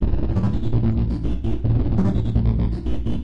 grossbass pitch shift3